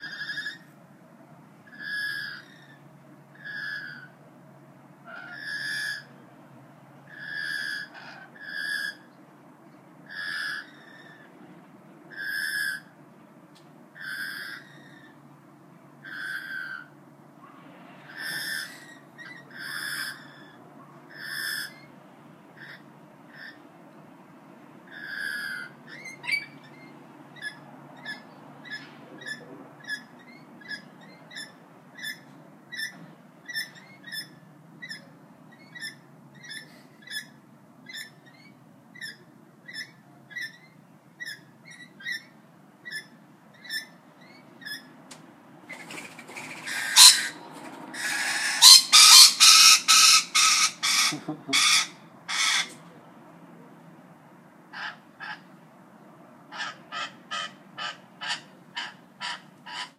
Stand Off
Two Rainbow Lorikeet Parrots stand-off over black sunflower seeds, each with an interesting soft chirp before one flies over and shoos the other. Neutral Bay, Sydney, New South Wales, Australia, 19/04/2017, 14:59.
Tweet, Winged, Chirp, Australian, Lorikeets, Tweeting, Seeds, Eating, Wings, Birds, Parrot, Rainbow-Lorikeet, Chirping, Australia, Bird, Lorikeet, Food, Rainbow, Sunflower-Seeds, Ringtone, Stand-Off, Parrots